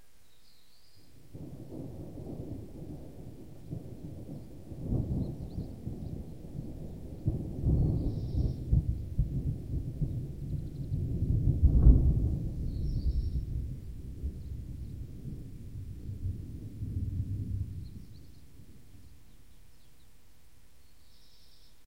This thunder was recorded by an MP3 player in Pécel, which is a town right next to the capital, Budapest, in a thunderstorm on 30th of May, 2009.
lightning, storm, thunder, thunderstorm, weather